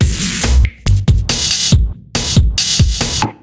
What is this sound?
Drums loop 140BMP DakeatKit-04
140bpm, drums, loop